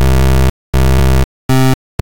8 bit bass figure 001 120 bpm note 13 C
120, 8, 8-bit, 8bit, 8-bits, bass, beat, bit, bpm, drum, electro, electronic, free, game, gameboy, gameloop, gamemusic, loop, loops, mario, music, nintendo, sega, synth